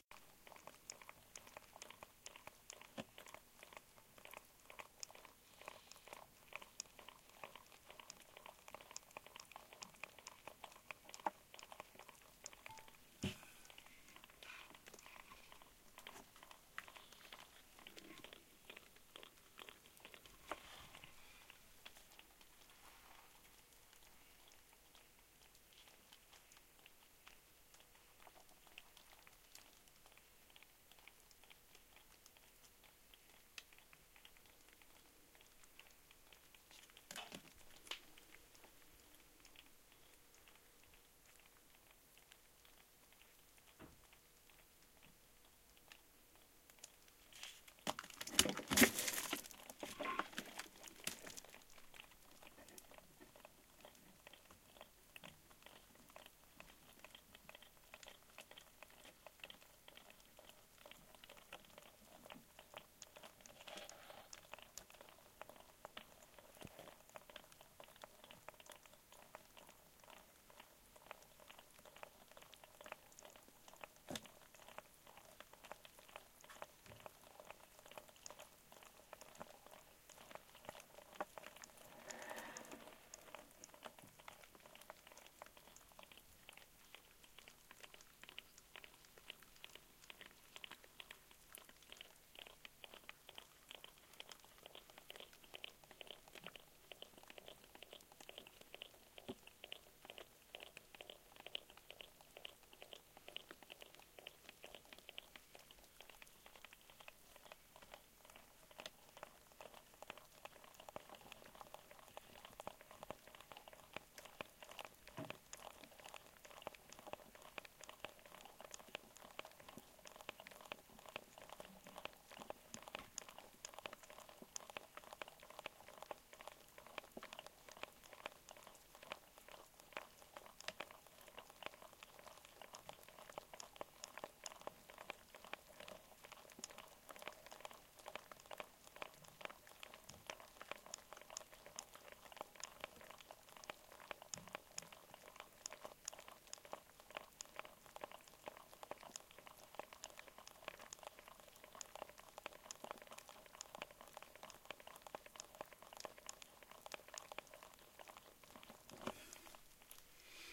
These are 8 lobsters sold at Louisbourg in a cool-box awaiting their demise, Saturday, 16 June 2007. Some of them were making this noise; others were silent, or just tapping their palps against the box or the other lobsters periodically. Part of a longer recording; I think I edited out the occasional bang where a lobster hit the mic, but my apologies if I didn't get them all.